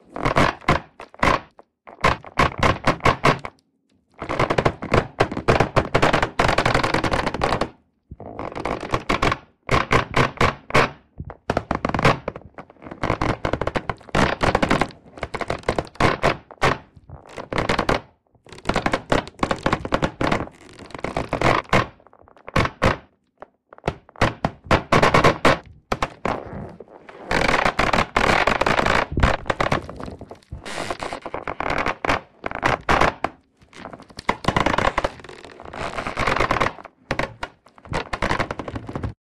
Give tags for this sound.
cable; creak; creaking; creaky; leather; metal; metal-cable; squeak

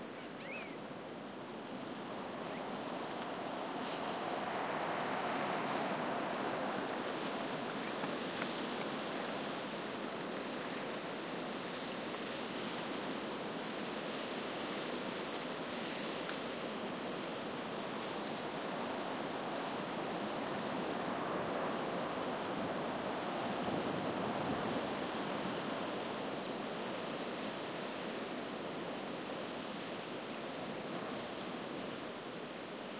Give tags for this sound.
field-recording; spain; wind; scrub

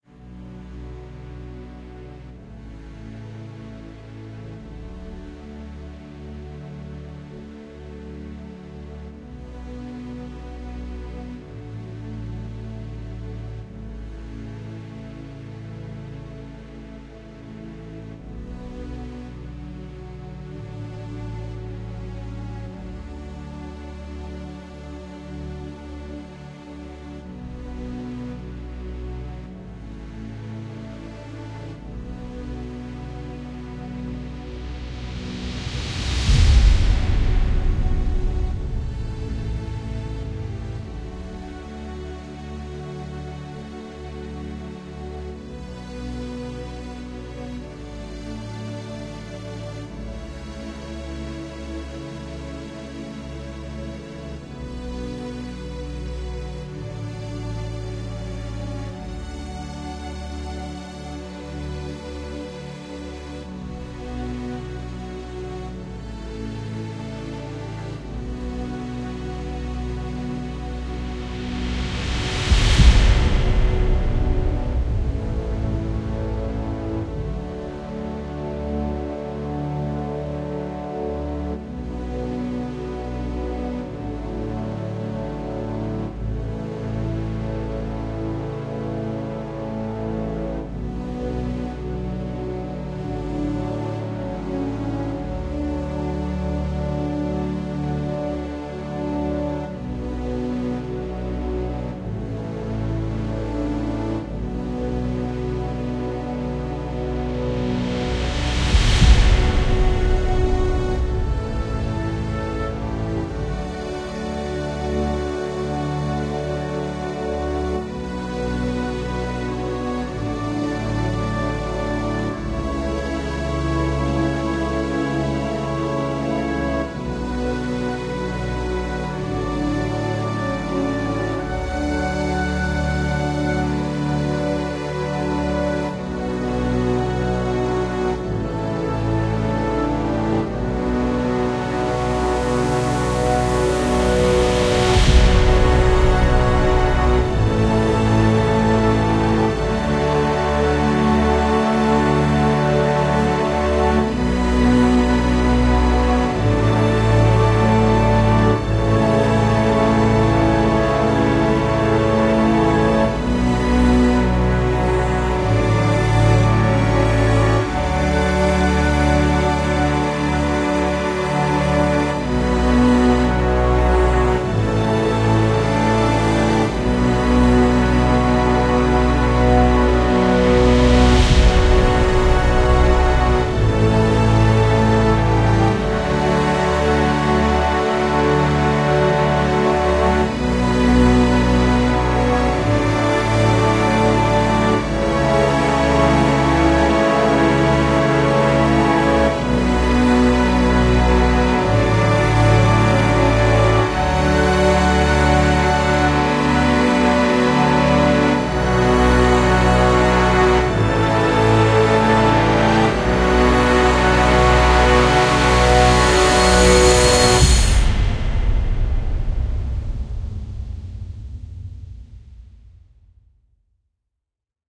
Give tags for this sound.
Brass; Build-up; Cello; Cinematic; Drama; Fantasy; Film; Free; Movie; Orchestra; Suspense; Trailer; Violin

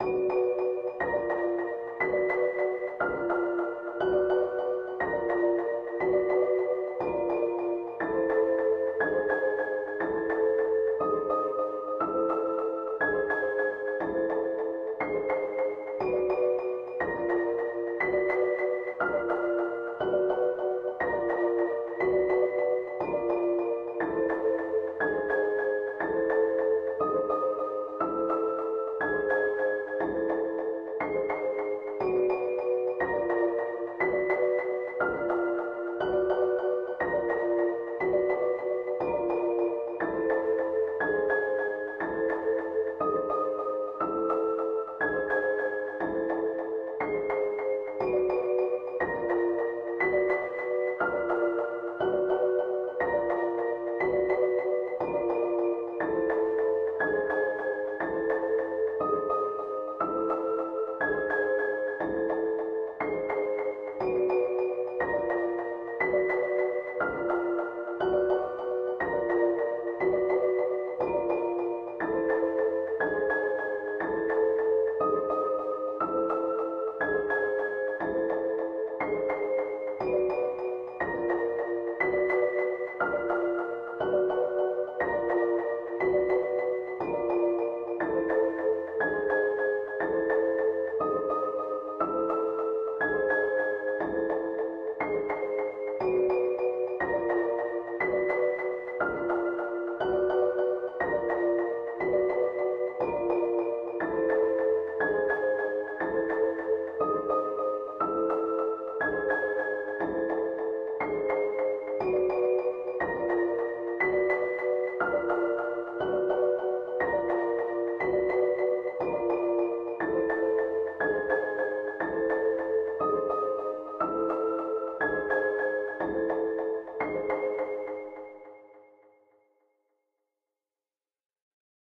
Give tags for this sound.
60bpm; bpm

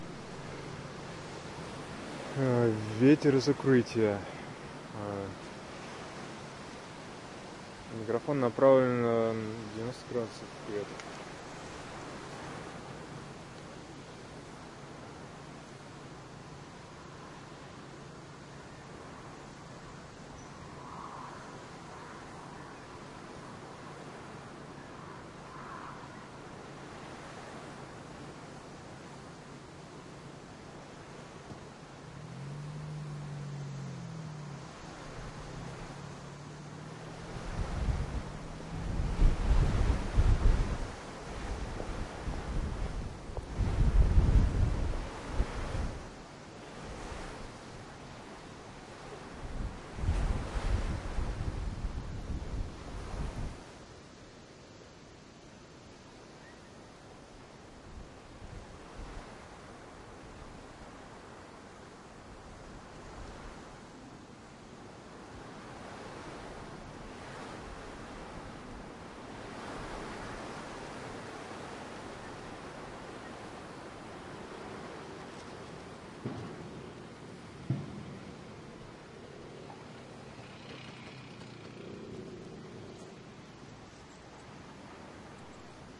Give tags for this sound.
devices; css-5; sanken; sound; field; field-recording

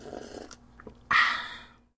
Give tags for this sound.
ahh; beverage; breath; drink; drinking; gasp; refreshment; slurp; slurping; soda; water

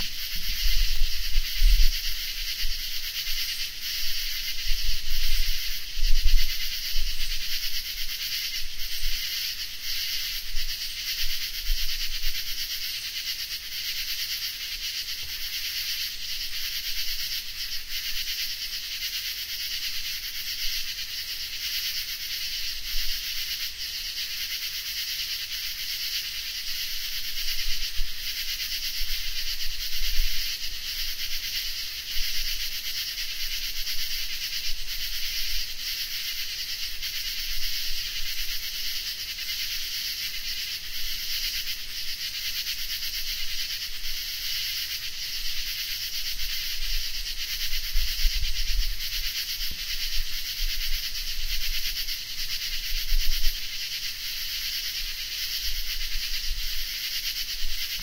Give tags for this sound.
Arrow-Rock; built-in-mic; field-recording; insects; microphone; nocturnal; PCM-D50; Sony; wikiGong

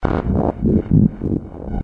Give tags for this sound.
1-bar; ambient; electronic; industrial; loop; processed; rhythmic; sound-design; sound-effect; stab; sweep

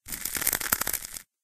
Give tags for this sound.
fist; hand

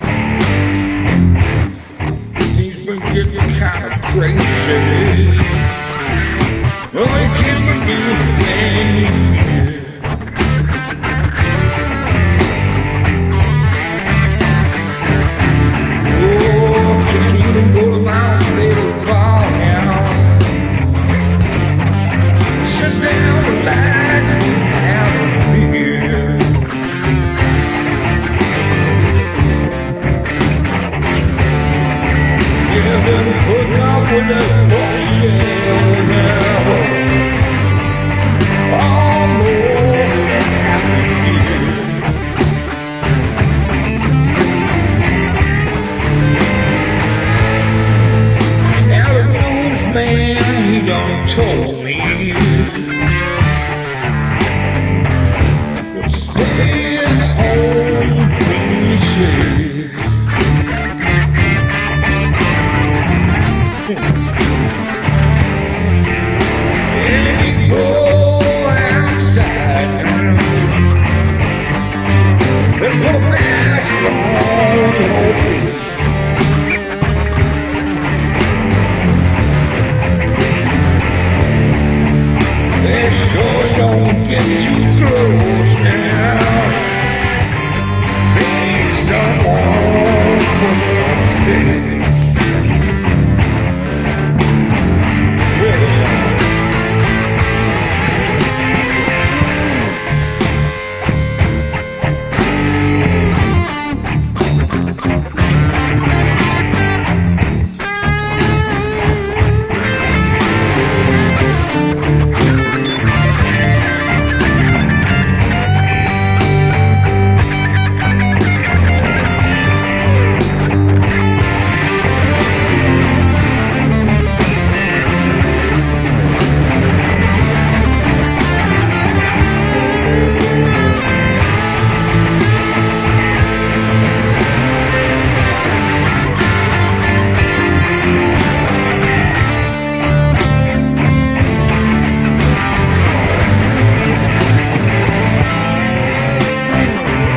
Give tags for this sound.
Traxis; Keyboards; Dub; Bass; Beat; Jam; Synth; Music; Clips; Original; Guitar; Blues; Rock; Drums; Beats; Electro; House; Audio; Loop; Dubstep; Techno

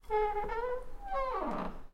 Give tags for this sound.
door; wooden; squeak; creak; normal

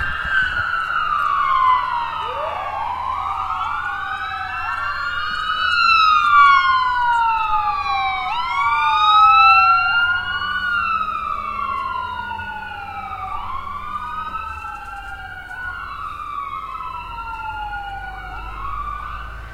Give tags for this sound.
emergency; cop; cruiser; city; vehicle; sirens; traffic; truck; car; engine; driving; field-recording; alarm; street; noise; ambulance; cars; horn; firetruck; police; road; siren

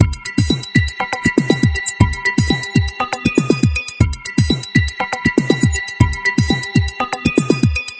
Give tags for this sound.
minimal; hiphop; drumloop; beat; fun; strange; weird; abstract

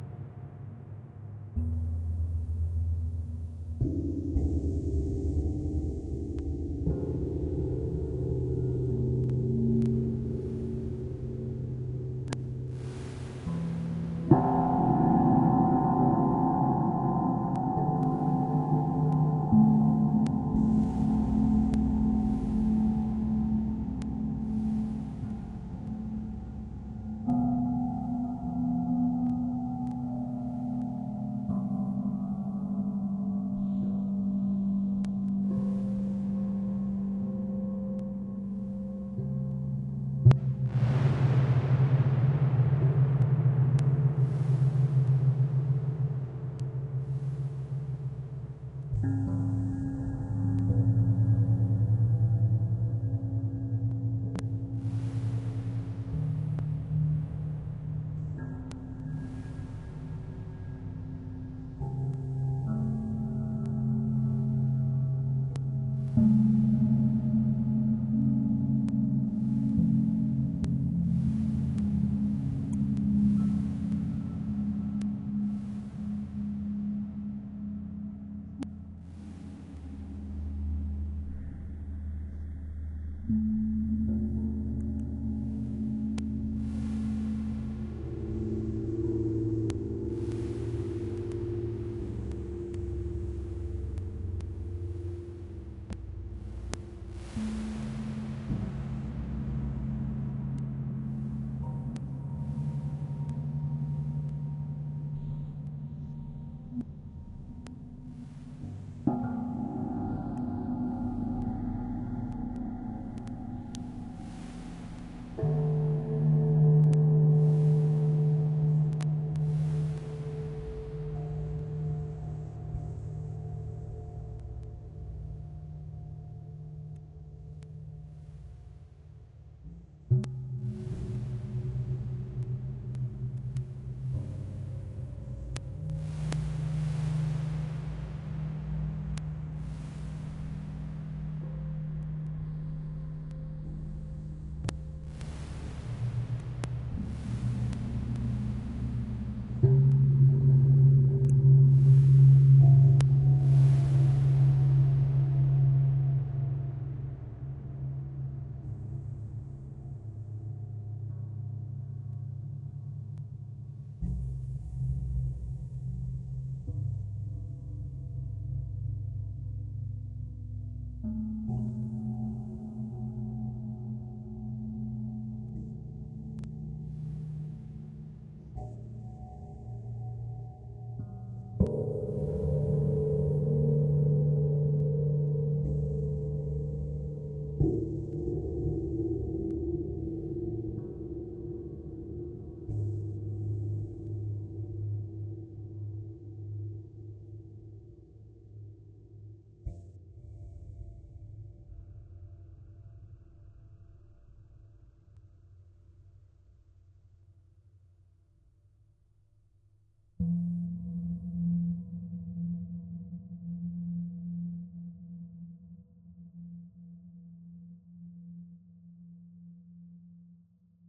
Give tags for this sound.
black; creepy; dark; darkness; haunted; void

